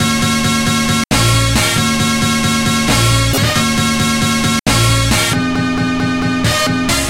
laftspunk-135bpm
I sampled a wood saw in my shed with a tuberstax platinum mic and a 90s Sony DAT. I then took the saw sample into my audio den and layered it with some samples of a Rolls Royce Phantom skidding outside Tesco. I pitched it all up, chopped it into 26 pieces and created a masterpiece!
filtered, funky, house